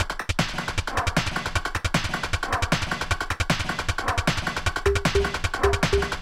Percussion from my latest trance track